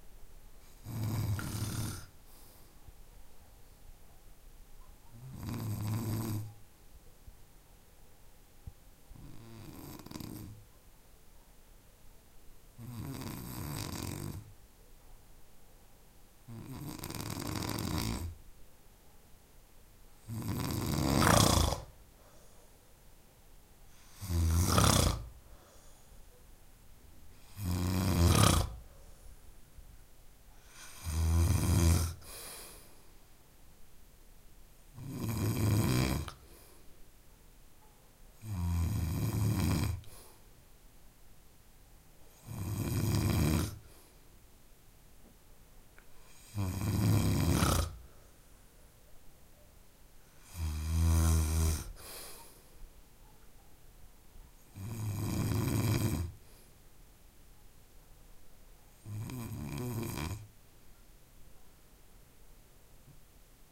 A man snoring kind of heavily during his sleep. Zoom H4 used for this recording, you can tell by the 'hiss' sound.
man, snore, sleeping, snoring, bedroom, sleep